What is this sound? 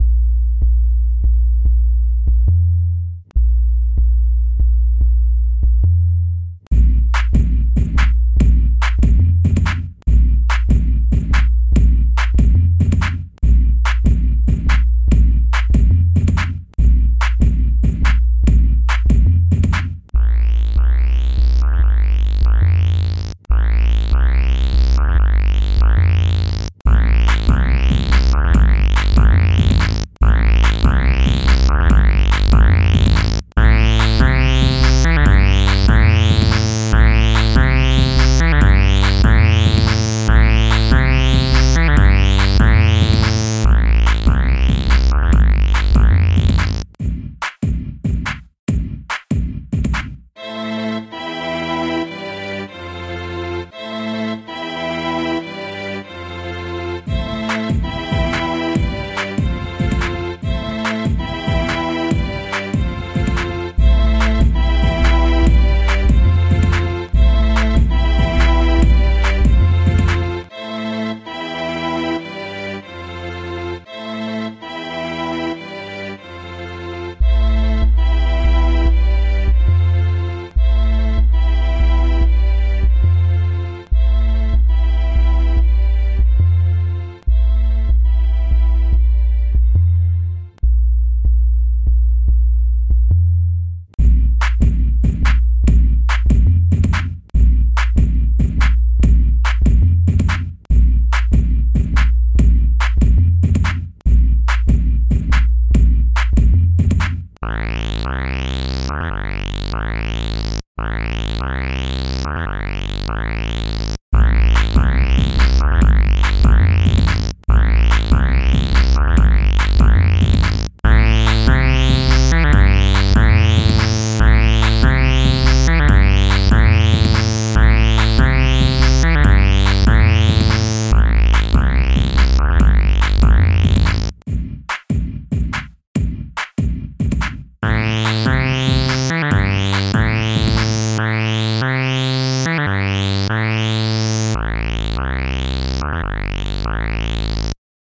dubstep bass
drum and bass with some synth and electronic stuff